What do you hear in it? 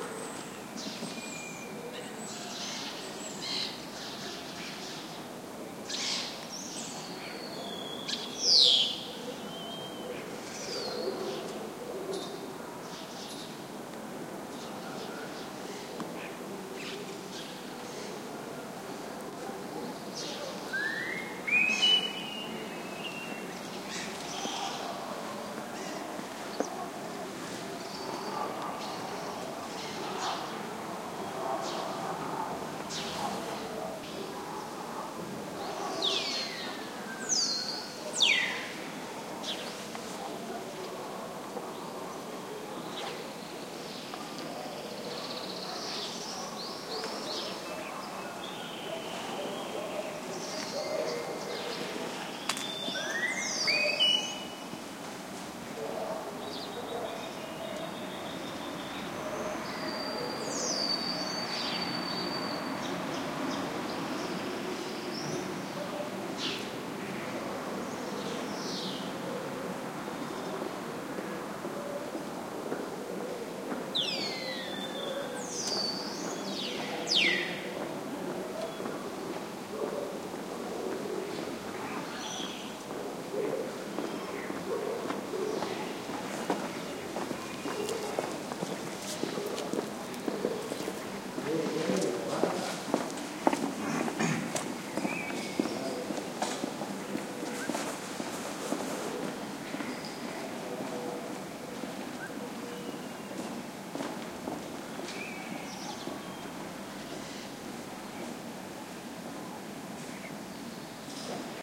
20111206 01.town.square
quiet afternoon ambiance with birds (Starling) singing recorded at the Town Hall Square of Valencia de Alcantara (Caceres, Spain)
ambiance, birds, field-recording, Starling